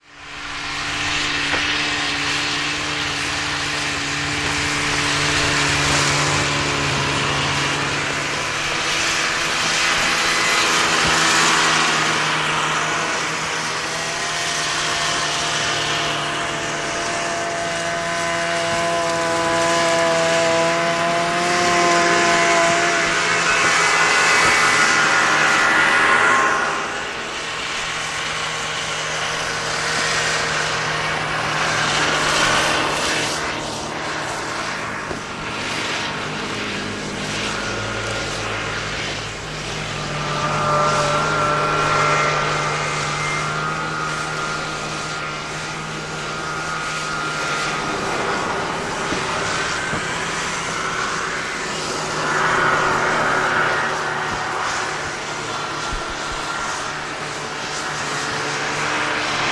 snowmobiles pass by
by, pass, snowmobiles